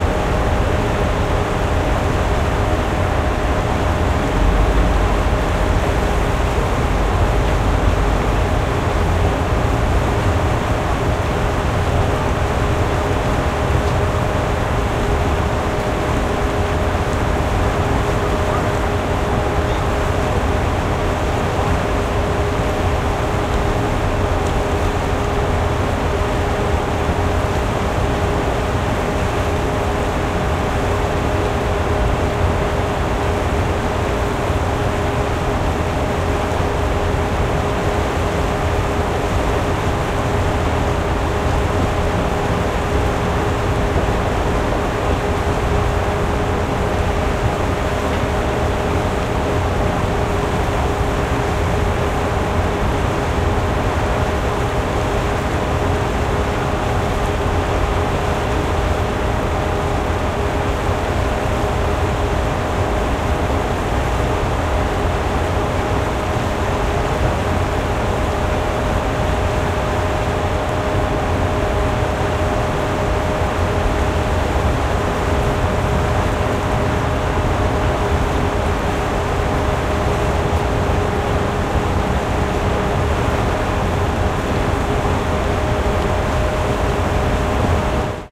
Belle of Cincinnati docked and idling
The sound of the Belle of Cincinnati riverboat engines idling on the Ohio River while docked.
Gear: Zoom H6, XYH-6 X/Y capsule (120 degree stereo image), Rycote Windjammer, mounted on a tripod.
ADPP, belle, boat, cincinnati, dock, engine, idle, idling, motor, ohio, river, ship, waterway